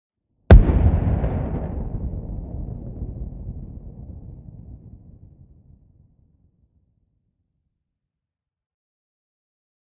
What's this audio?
huge explosion in distance
Made in ableton live. Layered together out of various explosions sounds and a kick drum. One sound layer ran through a guitar amp for distortion. Low-fi-ish.
dynamite, firearm, grenade, detonation, explosion, explode, war, military, battle, explosive, bomb